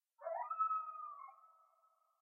This is a coyote outside my house in Tucson, Arizona, with noise reduction and reverb applied. Recorded using my computer's internal microphone.
animals, coyote, desert, night